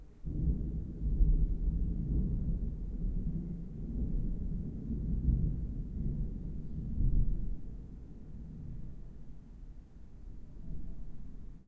Thunder From Room
The recording of a thunder from inside a room, next to a window.
Thundering, Thunder